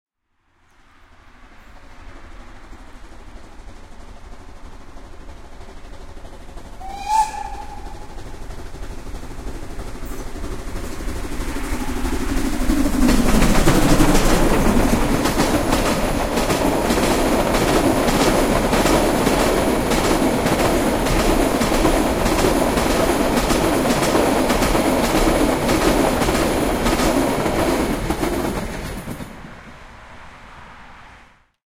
Recording with a Tascam DR-05
on June 27th, 2021 between Bad Doberan and Heiligendamm